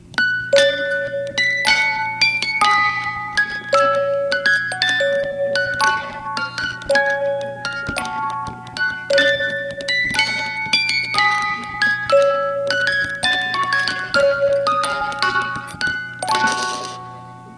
old timey music box